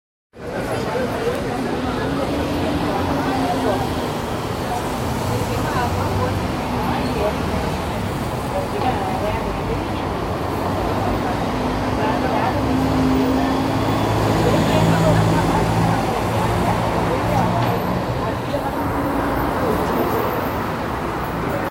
Background noise of a busy spot of a brazilian city (porto alegre). Chatter, bus noises, cars breaking and passing through in the background
Bus, Chatter, City, Noise, Portuguese, Public, Street, Traffic